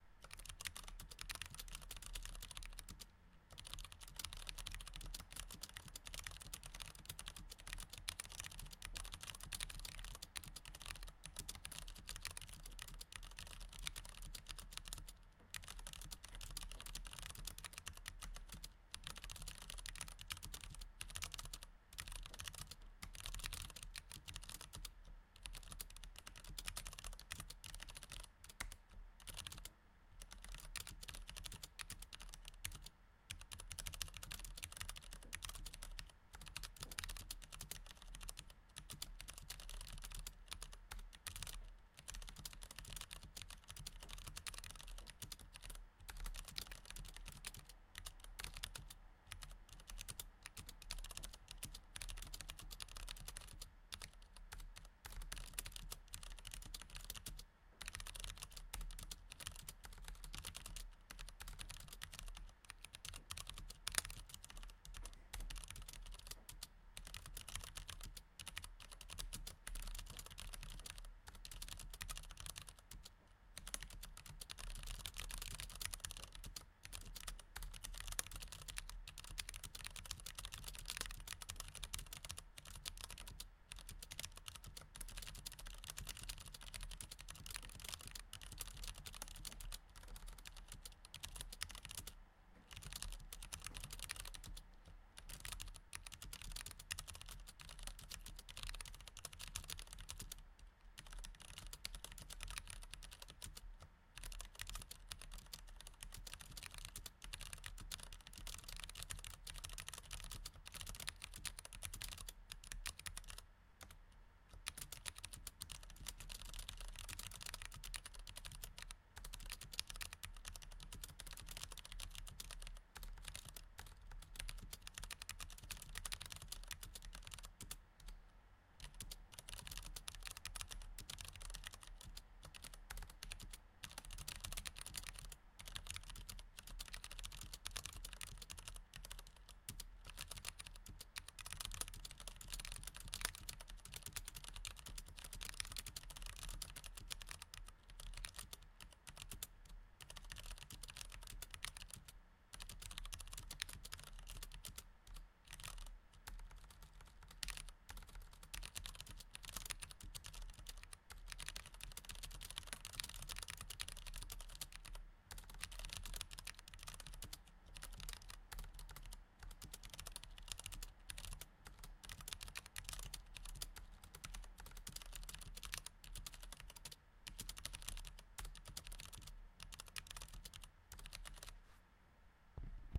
Mechanical Keyboard Typing (Bass Version)
Typing on a mechanical keyboard (Leopold FC660M, mx browns, enjoypbt and gmk caps)
This one is the more bassy of the two recorded using my keyboard.
Recorded with a ZOOM HD1 placed slightly above the left side of the keyboard.
typing, mechanical, keyboard